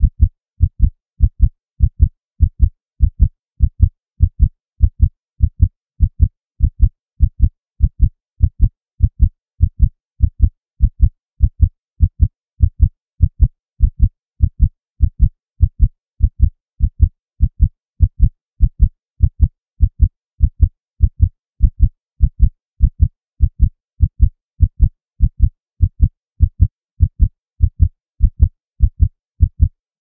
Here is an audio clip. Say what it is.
heartbeat-100bpm-limited
A synthesised heartbeat created using MATLAB. Limited using Ableton Live's in-built limiter with 7 dB of gain.